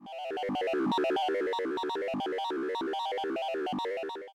Retro Robot Thinking 02
8bit, computer, effect, retro, robot, school, sound, think, thinking, tune, useful